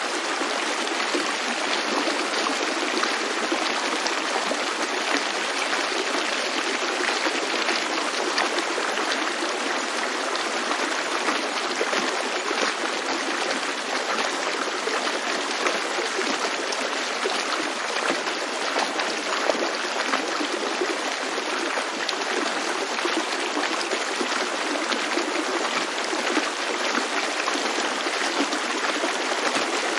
20060328.Deh.Aba.stream02
sound of a water stream / una corriente de agua
water, field-recording, nature, stream